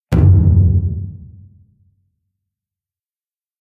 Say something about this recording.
I mixed several of my recordings which had a 'bang' feel to them, and processed them with reverb, bass boost, and some other effects.